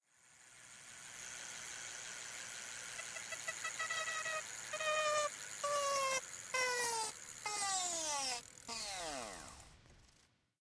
Bike Tire Varied Stop
Stopping a spinning bike tire with an introduced inanimate object (not the hand). Recorded on Stanford Campus, Saturday 9/5/09.
aip09, tire, bicycle, buzz, stop